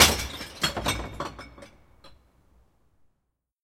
Throwing away glass trash.